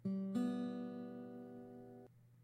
Guitar Pluck 3
A single pluck from an acoustic guitar.